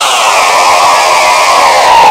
A saw noise. From the creator of "Gears Of Destruction" enjoy these sounds.
industrial, machine, factory, machinery, mechanical, saw, noise